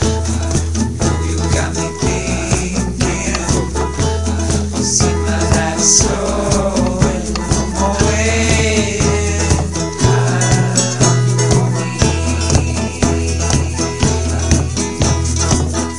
WASH2 Mixdown
A collection of samples/loops intended for personal and commercial music production. For use
All compositions where written and performed by
Chris S. Bacon on Home Sick Recordings. Take things, shake things, make things.
acoustic-guitar, beat, drum-beat, free, guitar, harmony, indie, loop, melody, original-music, percussion, piano, rock, sounds, vocal-loops, voice, whistle